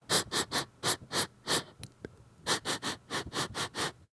Sniffing Schnüffeln
Courious, Nose, Smell, Sniffing, Taste